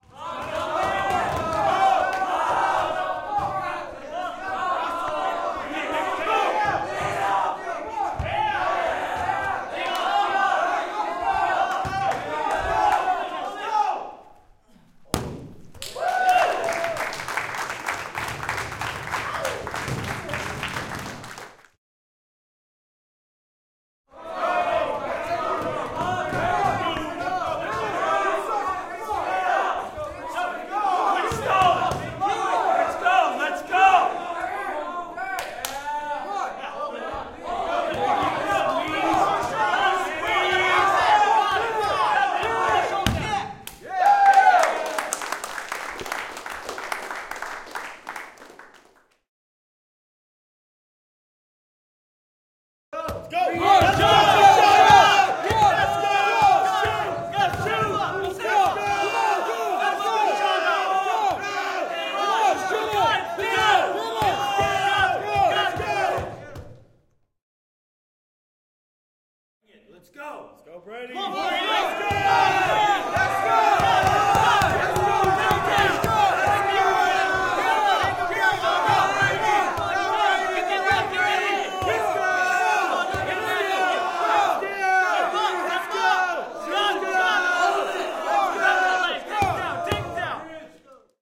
applause cheer int medium high school guys wrestling active good encourage friends gym2 echo
int, high, gym, cheer, medium, applause, guys, school